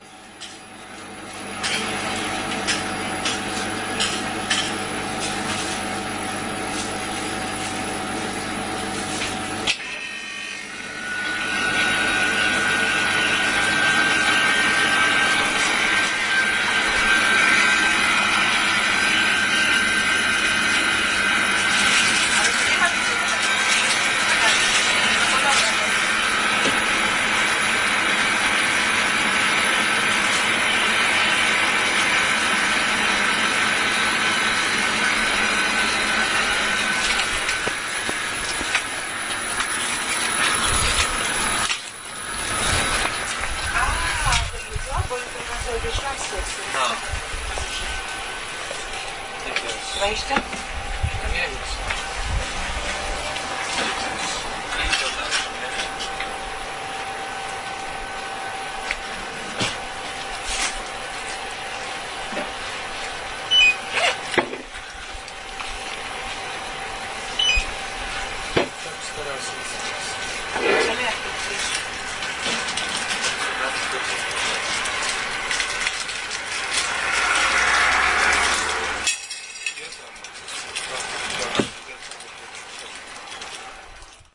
20.08.09: Ogrodowa/Piekary streets corner in Poznan. Zabka shop: refrigerators are swooshing, two people are buying Cola, ice-creams, water.
beeping, cash, shopping, swoosh, bleeping, shop, refrigerator